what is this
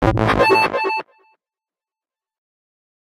weird synth
A quirky synth note I made on a softsynth.
electric, synth